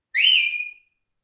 A stereo recording of a sheepdog whistle command.Away means go anticlockwise. Rode NT4 > FEL battery pre-amp > Zoom H2 line in.